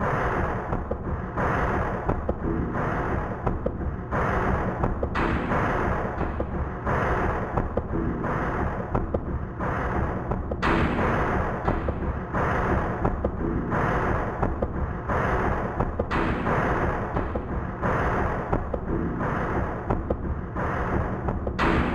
atmospheric drums for layering